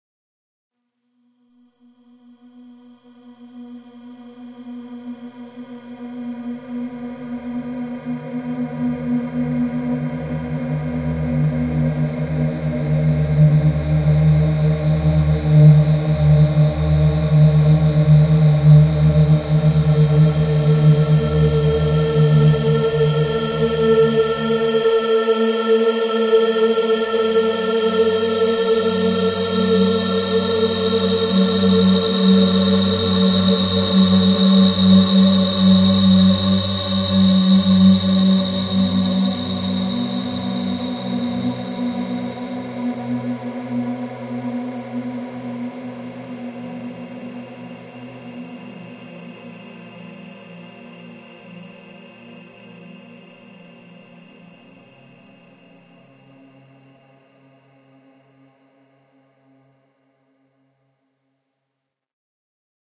About one and a half minute of beautiful soundescapism created with Etheric Fields v 1.1 from 2MGT. Enjoy!
Ambient, Electronic, Drone